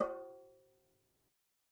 Metal Timbale left open 020
conga, drum, garage, god, home, kit, real, record, timbale, trash